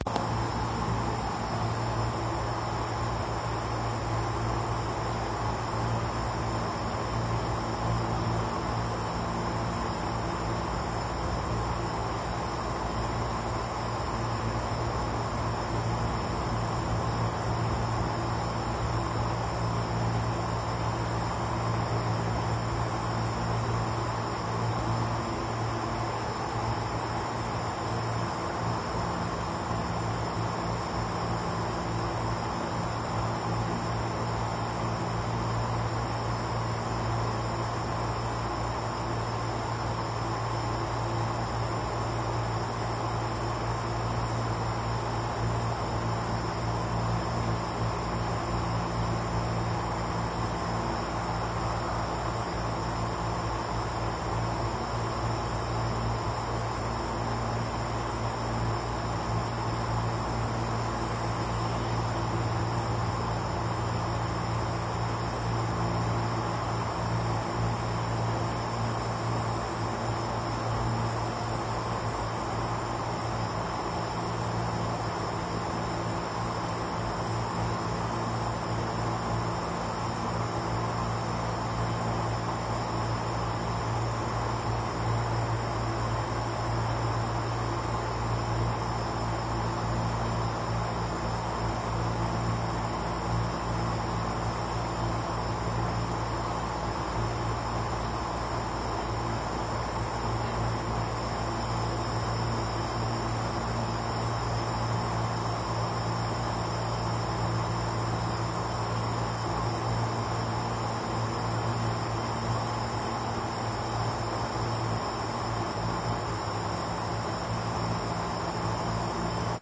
Air Conditioning
AC unit running during the winter.
AC, factory, fan, groan, machine, machinery, mechanical